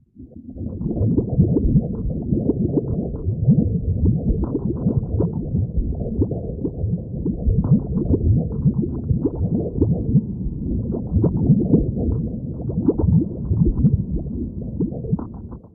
This is my son's heartbeat through a Doptone,
recorded with an old dictaphone. Captured on my computer with a M-Audio
I edited it in Soundforge; I made the sample a bit
longer by copying some fragments and adding them to the existing
sample. Used an equalizer to remove some of the hiss. I also used some
compression to stress the low frequencies a bit more.
You hear a watery sound, that's the Doptone
looking for the heartbeat; it's a very bubbling sound. Sometimes you
hear the heartbeat, that's that high pounding sound with a more splashy
character.
baby, bubbles, heartbeat, processed, water